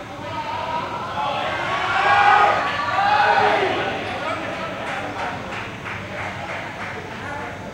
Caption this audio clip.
20100711.worldcup.03.oh!
people in my neighbourhood shouting during the play of the 2010 FIFA World Cup match (Spain-Netherlands). Sennheiser MKH60 + MKH30 into Shure FP24 preamp, Olympus LS10 recorder